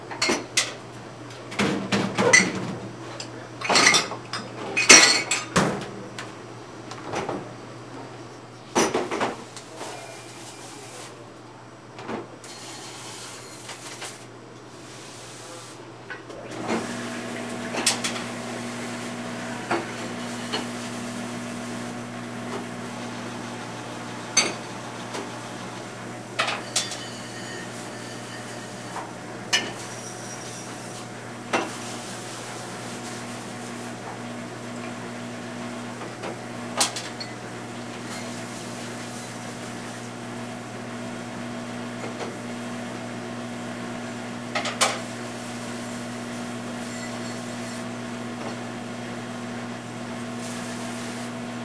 doing dishes
This is a recording of a barista washing dishes at the Folsom St. Coffee Co. in Boulder, Colorado. The water sprayer is heard, along with dishes crashing together as they are prepared to go into the dishwasher.
coffee, dishes, dishwasher, shop, sink